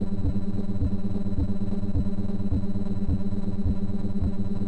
A pulsating hum, filtered? loud but soft at the same time
tense atmosphere, repeating, monotone
Mother 32 filtered with modulation
This sound is part of the Intercosmic Textures pack
Sounds and profile created and managed by Anon
Atonal, Pulsating, Noise, Drone, Synthetic, Hum, Rhythmic